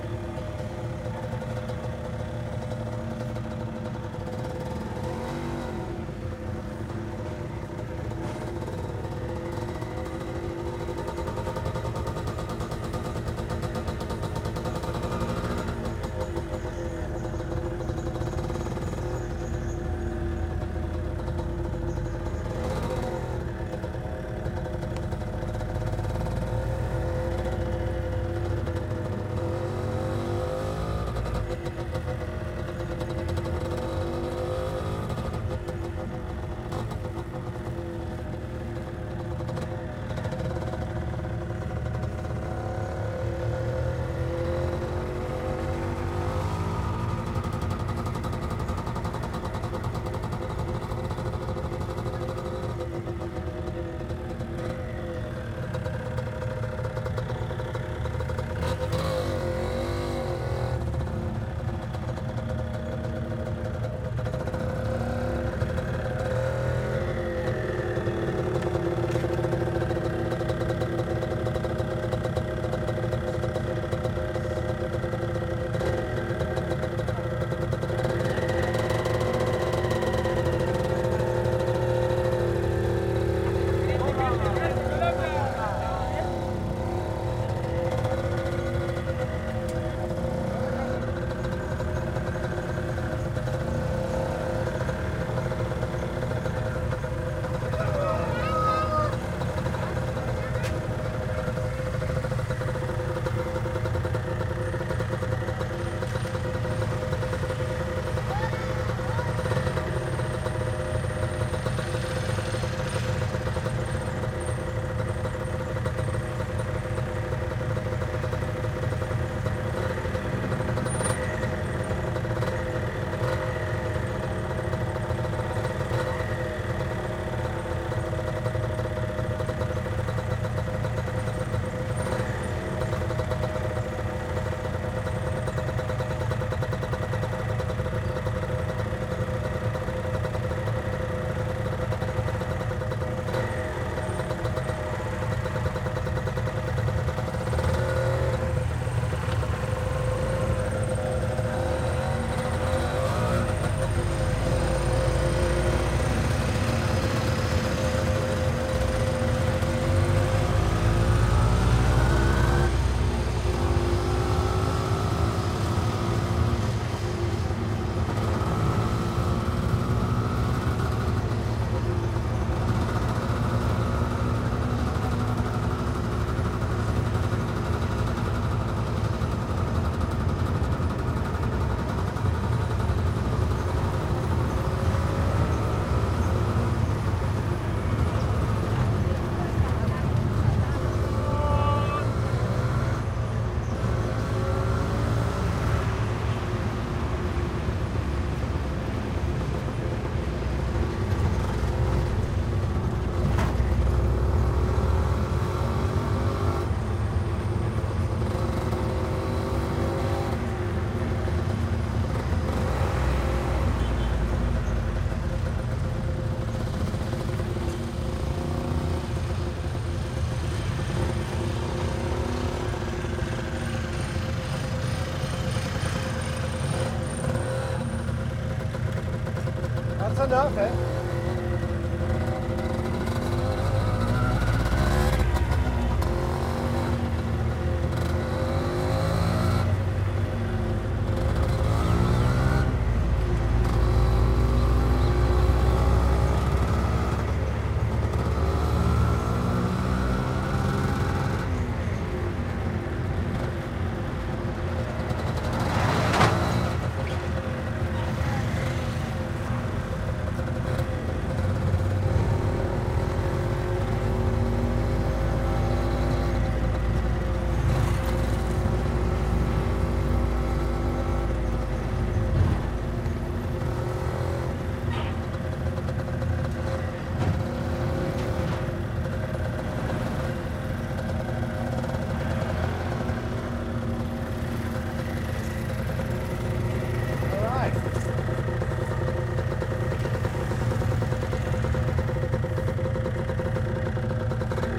motorcycle real throaty ride medium speed busy street recorded from front with directional mic